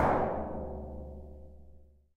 Single hit on a small barrel using a drum stick. Recorded with zoom H4.